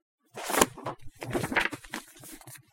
The sound of a book being opened and manipulated. A bit spotty because of the background sound I removed.